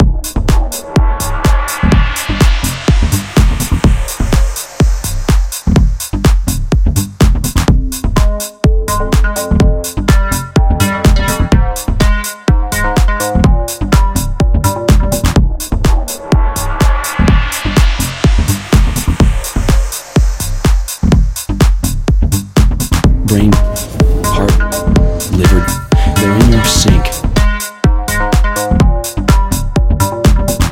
In Your Sink 125bpm 16 Bars
Beat loop with sample. Do you know where your organs are?
Beats, Loops, mixes, samples